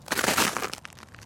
Icy snow in Sweden.
Recorded with Sennheiser MKH 416.
foley, footstep, footsteps, ice, snow, walk